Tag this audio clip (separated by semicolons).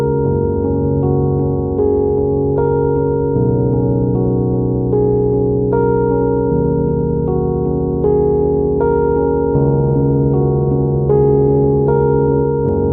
melodic; ambient; sadness; atmosphere; calm; rhodes; soft; piano